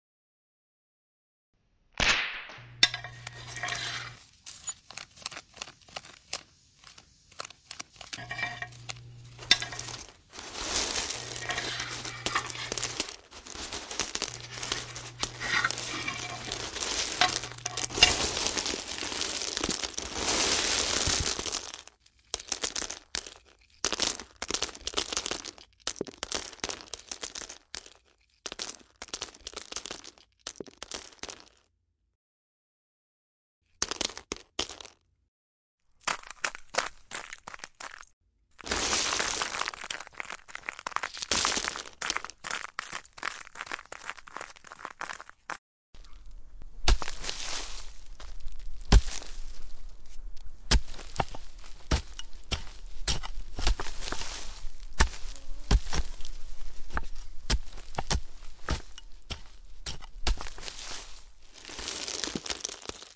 Tomb Escape (no breath)
Escaping a tomb - cracking through a stone wall and manoeuvring through a narrow tunnel, scraping gravel and earth out of the way. Can be used as a whole scene or broken into smaller clips.
breaking-stone, digging, dirt, earth, escape, gravel, movement, pick, rock, stone